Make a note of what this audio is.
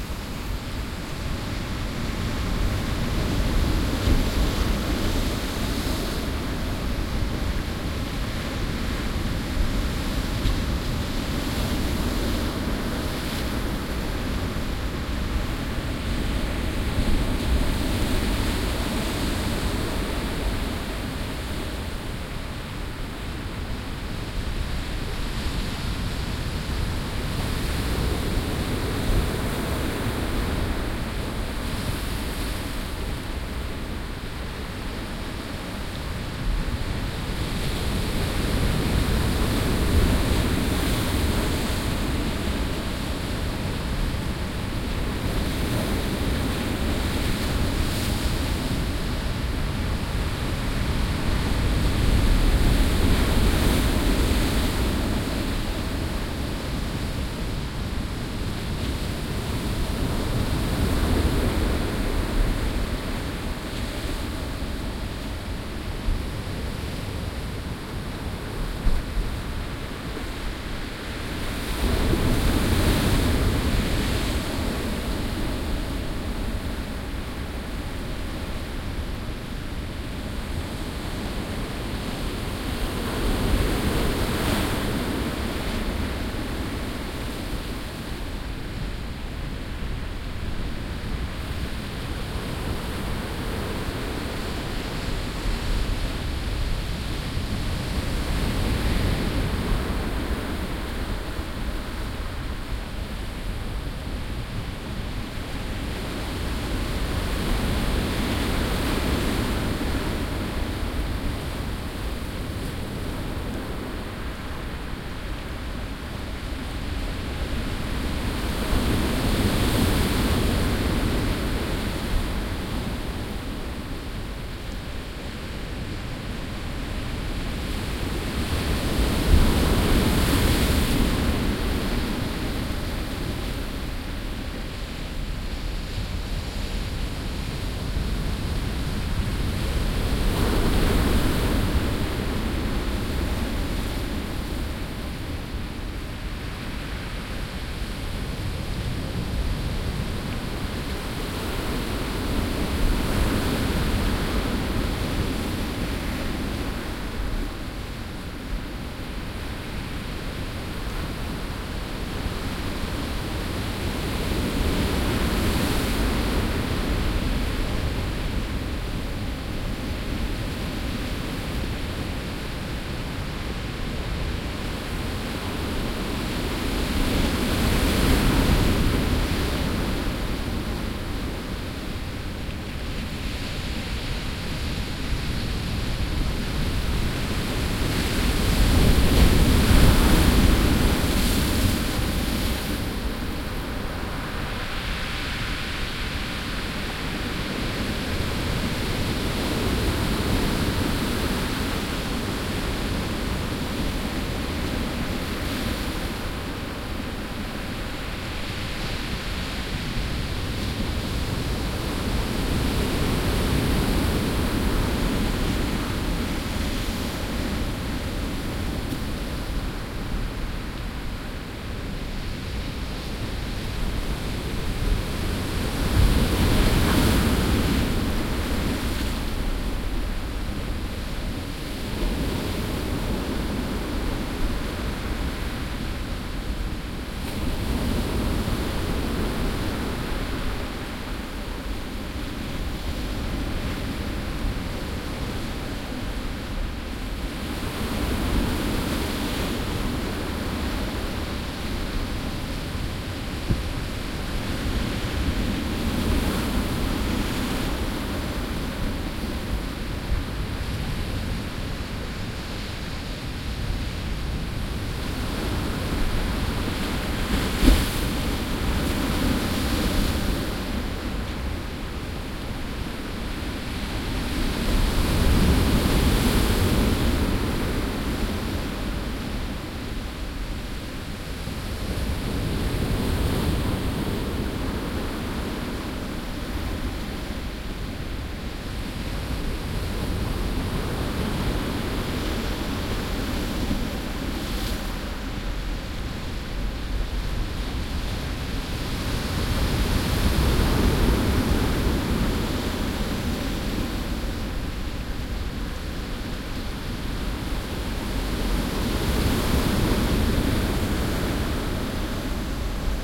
porto 19-05-14 quiet to moderate waves on rock beach 10m from surf break
Quiet day, close recording of the breaking waves.